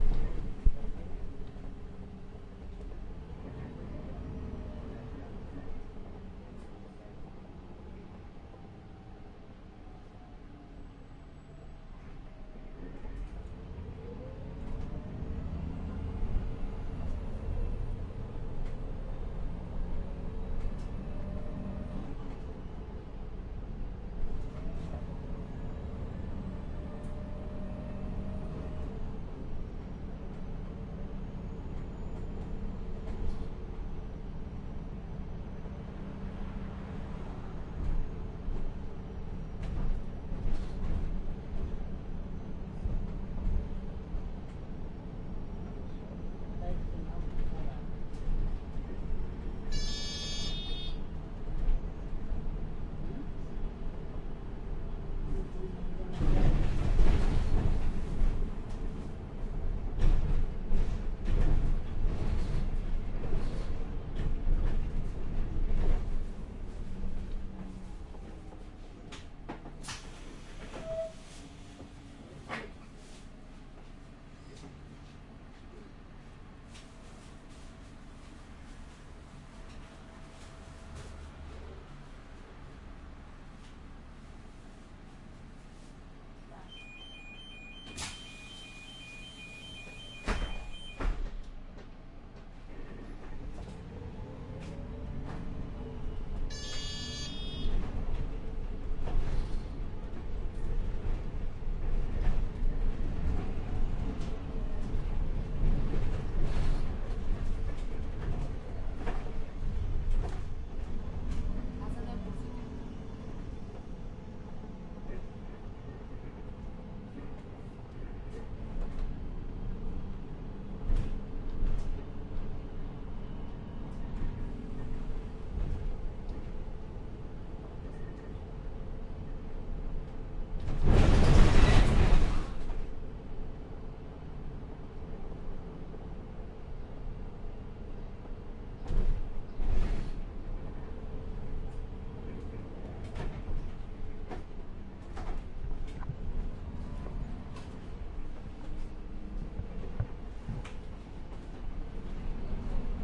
porto inside bus 10
Porto, Portugal, 19th July 2009, 6:45: Inside a public bus in Porto on a sunday morning. The bus is MAN built and powered with natural gas. Two times the distinct stop signal is triggered and in the and it drives over a big bump. Some times people are chatting.